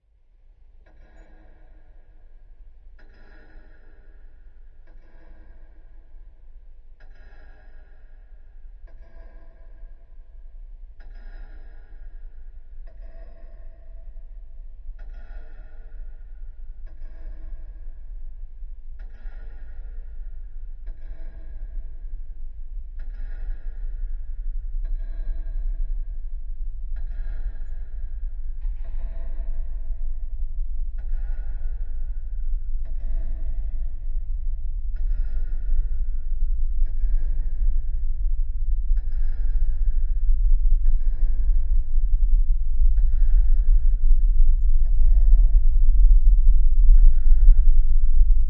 This was designed for a short film whereas the subject is checked-out, mentally, and builds up before the 'snap' of a mental break. The room had a grandfather clock and basic evening white noise with the sound of internal blood flow quickly amped.
ambiance, ambience, ambient, anxious, atmosphere, background-sound, bass, daydream, design, drama, dramatic, drone, grandfather-clock, mental, noise, pendulum, psychosis, rumble, sound, tension, thrill, tick, ticking